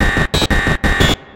Chip Rock Drum Loop
180bpm drum loop with an 8th note feel. Might sound good paired with fast rock drums.
180-bpm, chiptune, drum-loop, drums, noise